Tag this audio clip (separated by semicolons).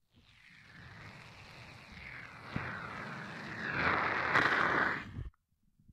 pups; shave; rasierschaum; foam; furzen; sounddesign; scheren; shaving; fart; schaum; cartoon; rasieren; scheerschuim